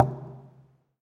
Recordings of different percussive sounds from abandoned small wave power plant. Tascam DR-100.
field-recording, hit, industrial, percussion, plastic